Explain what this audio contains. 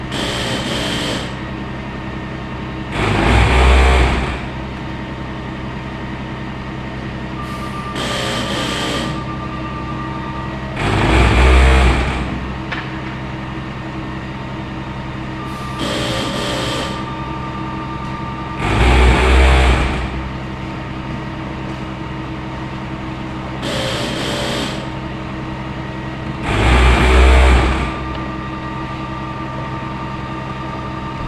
A very strange couple of sounds that I really have no idea what they are. Some sort of drilling perhaps and strangely rhythmic.
truck, industrial, noise, grind, drill, construction